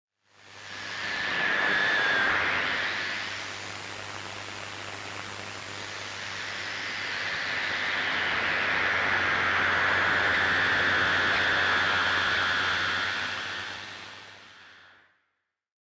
Radio Windy Noise
some "natural" and due to hardware used radio interferences
interferences, radio